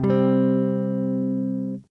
Jackson Dominion guitar run through a POD XT Live Mid- Pick-up. Random chord strum. Clean channel/ Bypass Effects.
clean, electric, guitar, strum